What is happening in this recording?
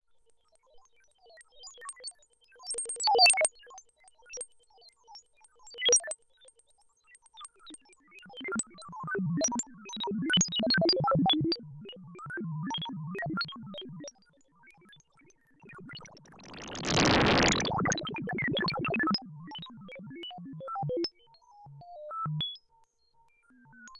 Using modules through Analog Heat.